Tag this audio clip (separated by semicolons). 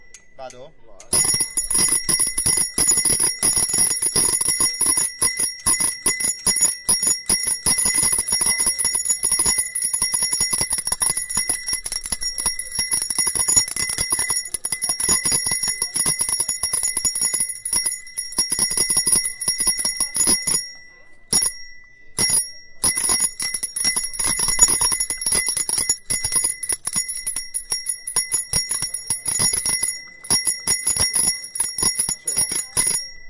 bell; bicycle; bike; cycle; horn; human